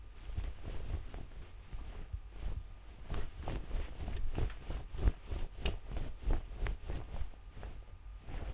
Clothing rustles
Sounds of clothing rustling that could be used when somebody is running or fumbling through clothes etc.
movement, clothing, cloth, rustle, run, foley